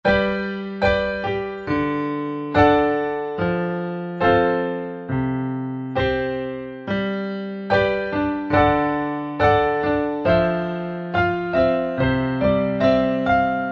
140bpm Piano Solo 11.Apr 10
For use at your leisure. I make most of them at 140 bpm so hopefully one day they make their way into dubstep.
Chop/splice/dice/herbs and spice them, best served piping hot, enjoy.
Fondest regards,
Recorded with Logic Pro 9 using the EXS24 sampler of the steinway piano (Logic Pro default) with a touch of reverb to thicken out the sound.